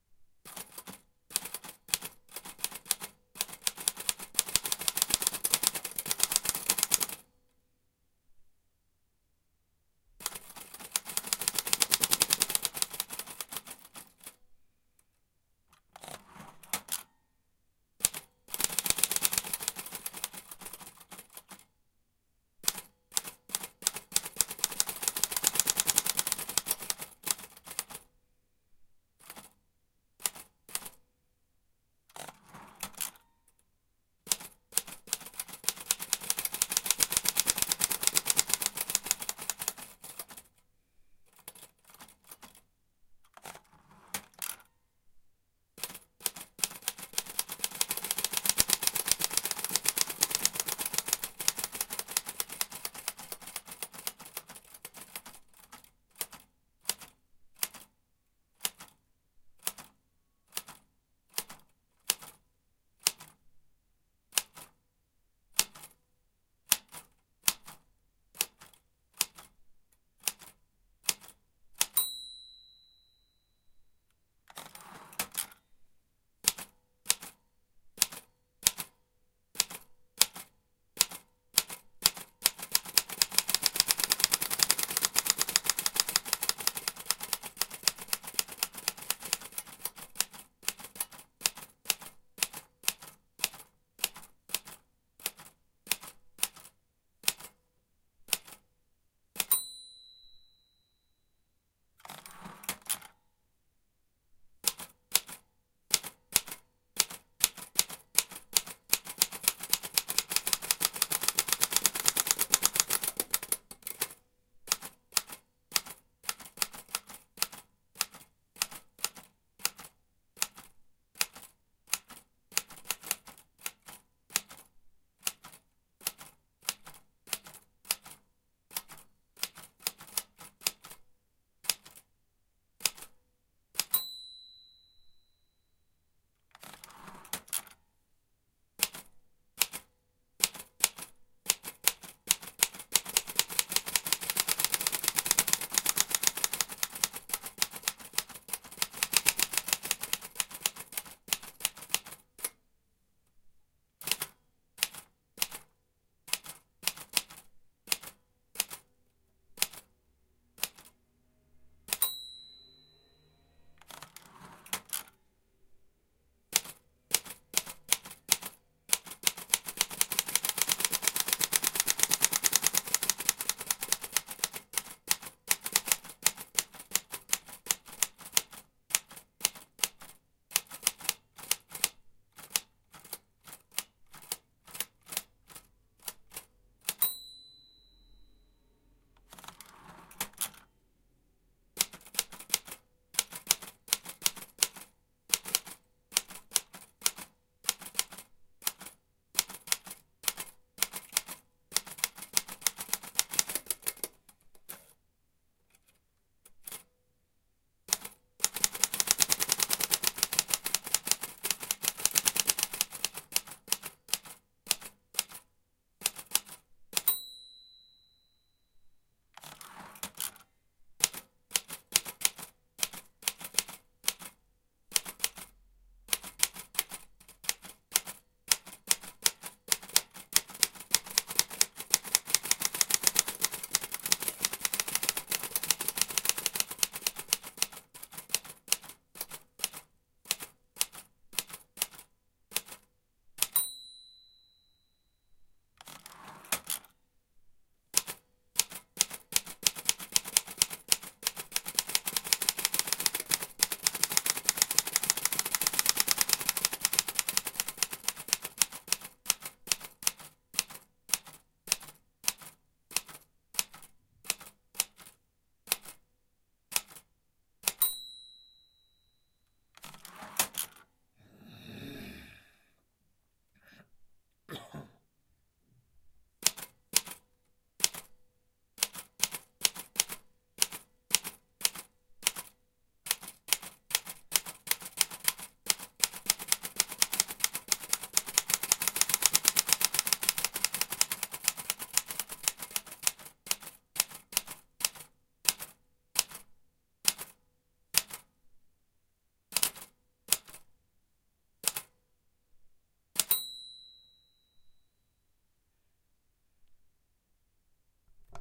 A old typewriter with all sounds you need
typewriter, old, machine